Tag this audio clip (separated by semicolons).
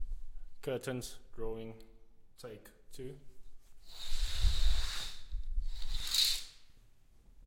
Closing; Curtain; Curtains; Open; OWI; Window